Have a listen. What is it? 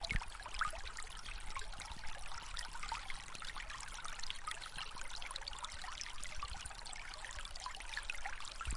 Little river, recorded at various locations with a zoom h2
field-recording, nature, river, runnel, water